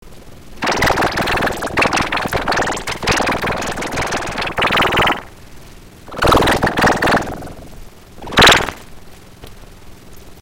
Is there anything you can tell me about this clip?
alien voice 2
creepy, voice